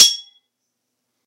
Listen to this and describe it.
Sword Clash (59)
This sound was recorded with an iPod touch (5th gen)
The sound you hear is actually just a couple of large kitchen spatulas clashing together
clang, clanging, clank, clash, clashing, ding, hit, impact, iPod, knife, metal, metallic, metal-on-metal, ping, ring, ringing, slash, slashing, stainless, steel, strike, struck, sword, swords, ting